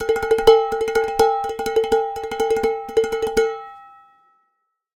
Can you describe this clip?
Stomping & playing on various pots